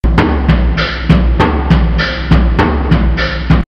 Recorded on a Pearl drumset. Only one of the tams, the bassdrum and the hi-hat is played. Recorded in a practising room located at the the former base for the american military in Iceland. Recorded through a yamaha preamp. Sound altered slghty in Cubase, adding reverb as well as compression to the file. Enjoy !